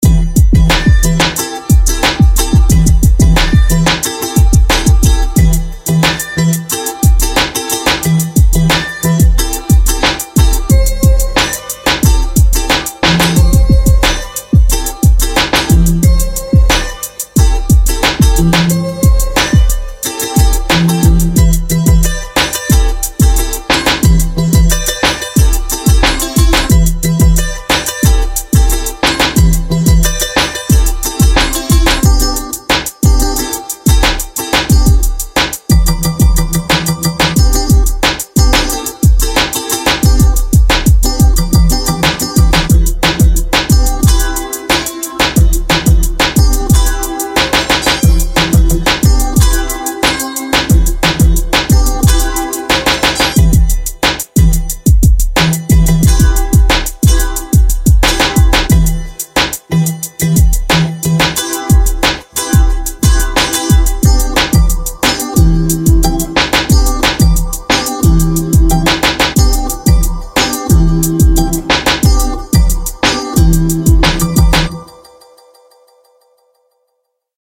Hip Hop beat & sound DEMO
interlude, loop, disco, podcast, jingle, intro, dance, radio, move, drop, beat, pbm